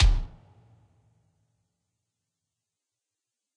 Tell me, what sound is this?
Hardcore Kick
A nice EQ'd Kick with some extra punch, good top end without sounding too clicky. Original format from bochelie. Edited with Audacity.